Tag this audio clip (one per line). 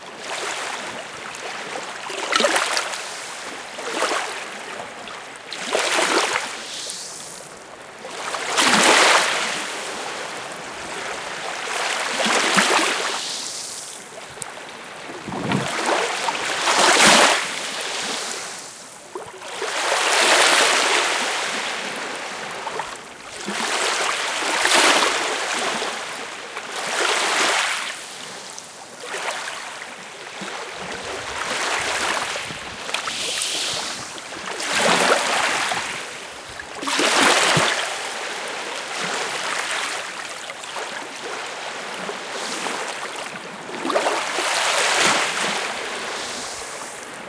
atmosphere
beach
sand
sea
small